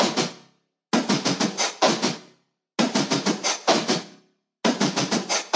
FX Audio loop1
produce with analog Arturia tools , many DAW´s and Vst
2 bar loop
dance, techno, dub-step, rave